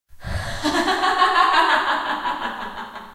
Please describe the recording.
female evil laughter
Me laughing evil with some resonance on it
laugh, laughter